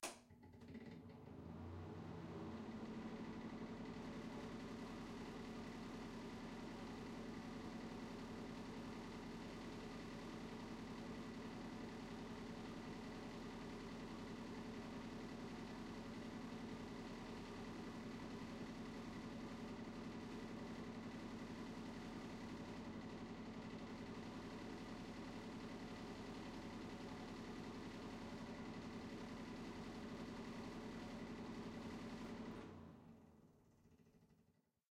VENTOLA BAGNO
sound of the fan to the bathroom without windows
special, fan, sound, bathroom, home